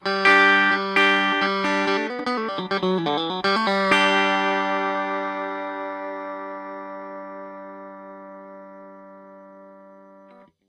Guitar Riff 2
Electric guitar riff played with a Tom Anderson guitar.